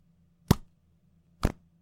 Opening and Clossing Lotion Tub Lid
This is the sound of the lid popping on and off of a tub of lotion.
closing, focusrite-scarlett-2i2, lids, lotion, opening, OWI, plastic